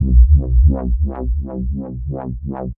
174 Sec.Lab Neuro Bubles5proket
Neuro Bass by Sec.Lab
Neuro, dnb, synth, Neurofunk, dark, Bass, Jungle